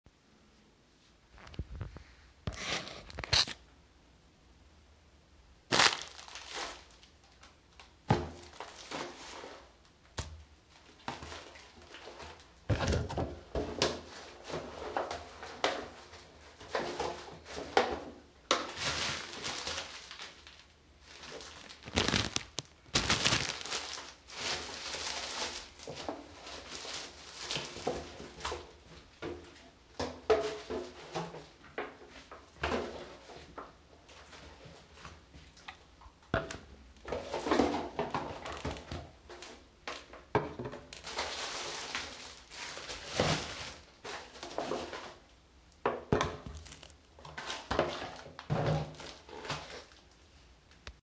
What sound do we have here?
Recording of grabbing assorted products from the cash-register.